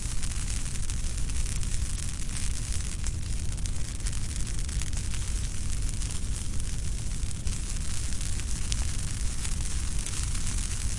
Ambiance Fire Bushes Loop Stereo
Close Recording of a Fire in Bushes (loop).
Gears: Zoom H5